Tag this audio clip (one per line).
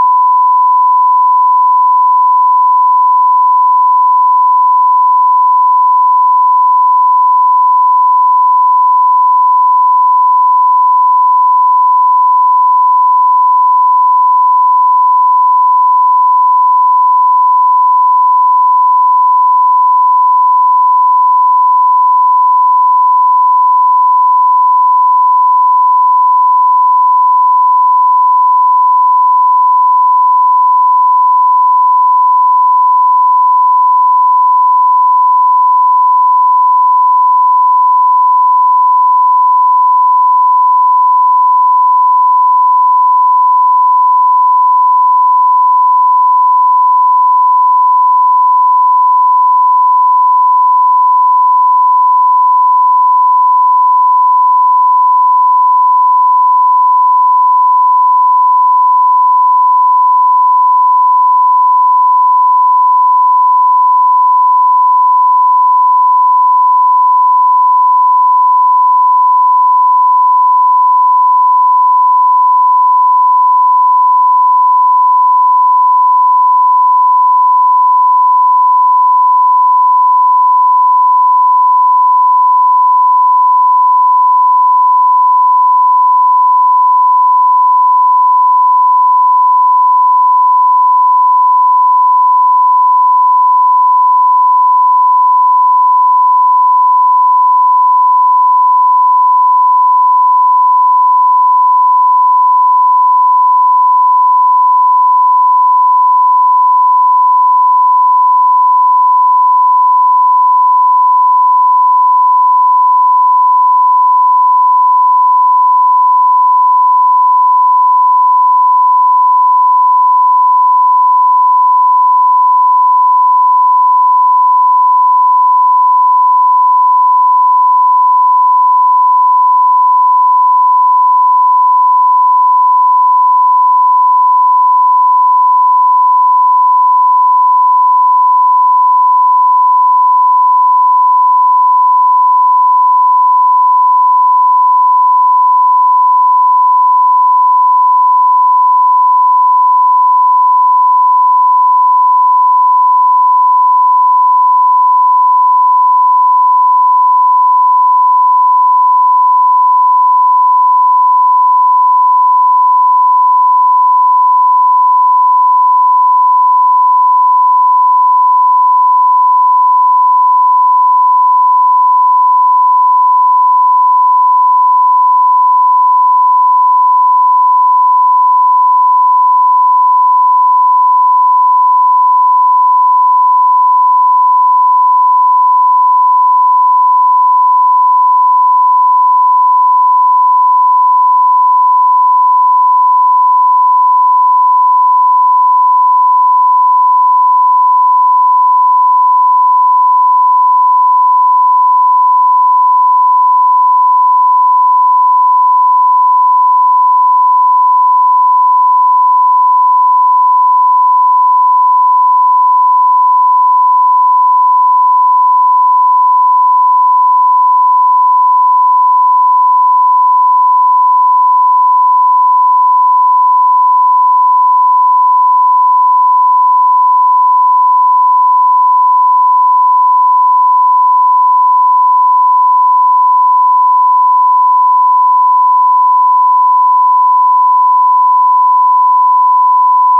sound
synthetic